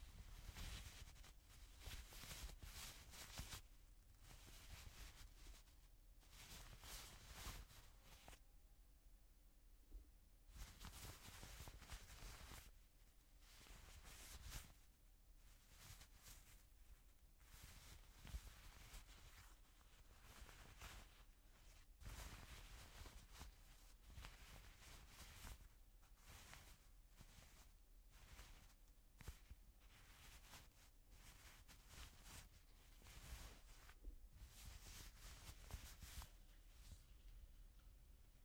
Foley - Cotton clothes rustling - Fabric movement sound
Foley sound of a movement or rustle of fabric - cotton clothes. Recorded with Audio-Technica boom mic on Tascam DR60dM2
cloth, clothes, cotton, fabric, fiber, foley, fx, movement, moving, rustle, sfx, sound-effect